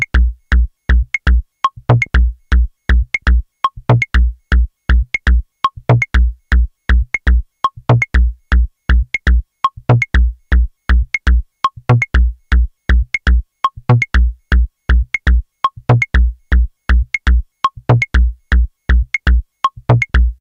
Some recordings using my modular synth (with Mungo W0 in the core)
Analog, Modular, Mongo, Synth, W0